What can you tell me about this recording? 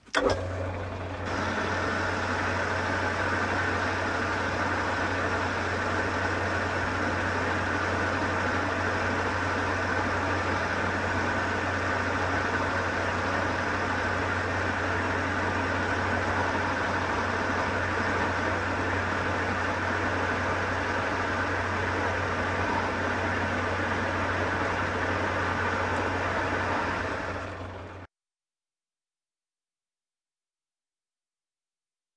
atlas lathe 6inch in use v2
Atlas lathe 6inch in use. Recorded on ipod touch 3g with blue mikey microphone and FiRe app.
It's just interesting to find out.
Thanks to My Dad, Bernard for operating his lather and enabling me to record his amazing machines!
6inch, atlas, continuum-4, lathe, machines, power, sound-museum, tools, woodworking